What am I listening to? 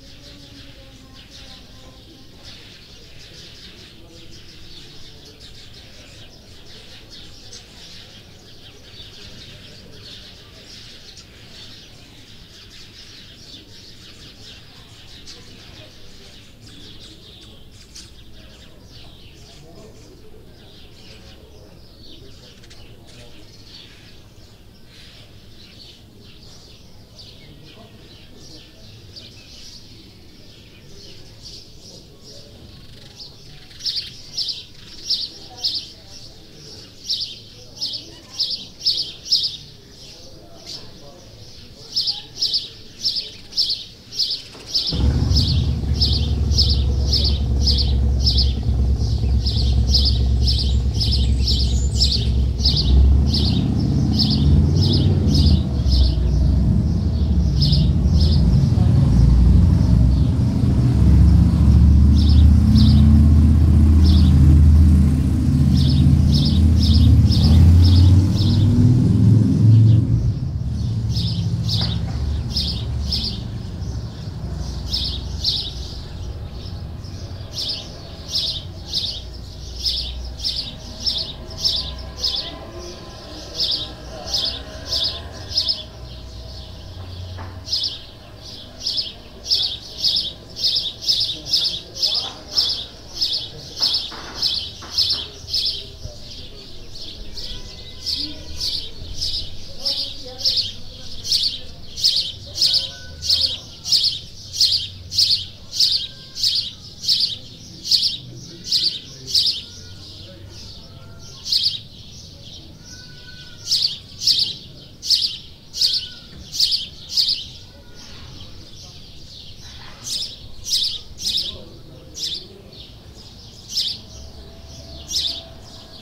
bird ambiance with motorcycle
field recording with lots of birds @ a village in Spain.
4th recording in a group of seven.